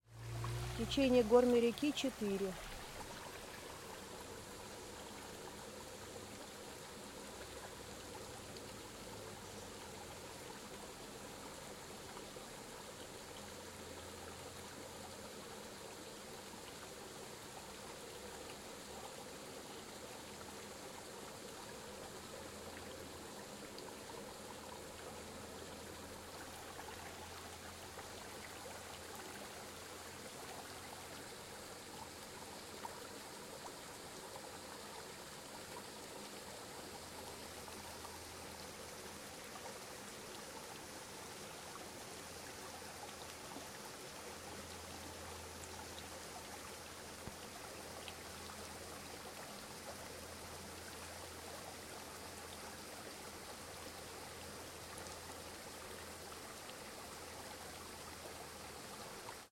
Mountain River 4(3)
various spots on small river
river, Small, field, water, field-recording